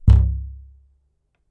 Nagra ARES BB+ & 2 Schoeps CMC 5U 2011. percussion on wooden board, resonant low sound.
wooden, board